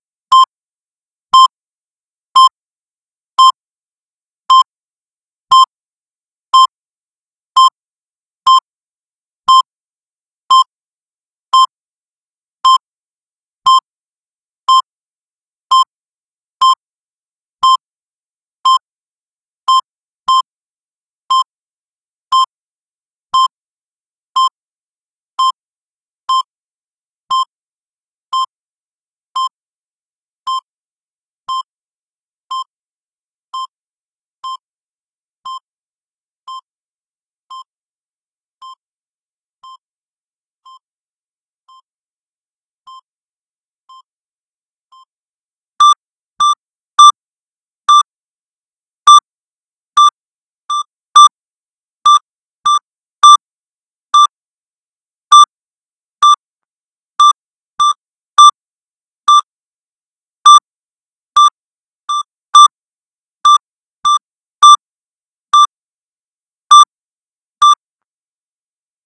flat lining beeps
Hello I am an all around artist currently going to school for my Master's in Art Education. This is the first sound that I have created to represent the sounds of the heart monitor I was on due to seizures. Hope you like it.